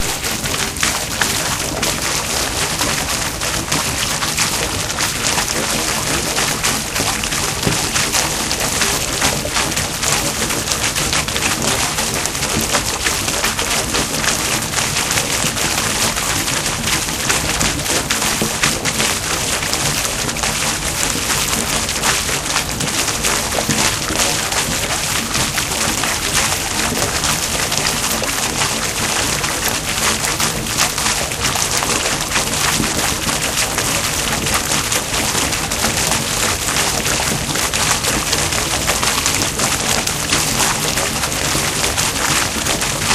Sounds recorded while creating impulse responses with the DS-40.